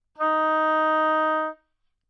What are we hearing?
Part of the Good-sounds dataset of monophonic instrumental sounds.
instrument::oboe
note::D#
octave::4
midi note::51
good-sounds-id::7963